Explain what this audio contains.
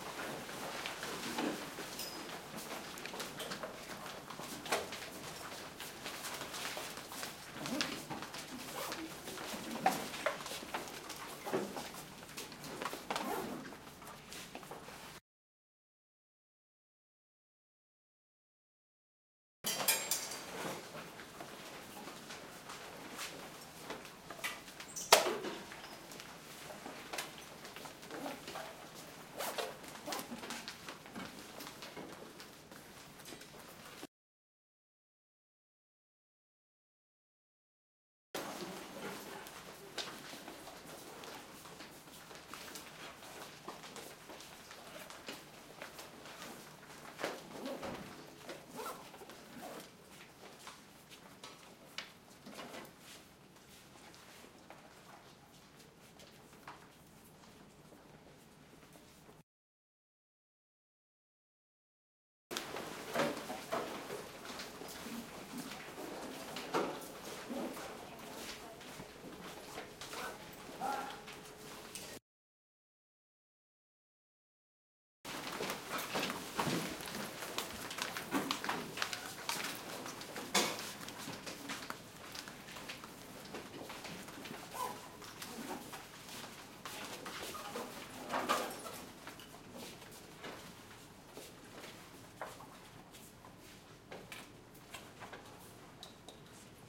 high school students get up from desks in classroom paper pens movement no voices various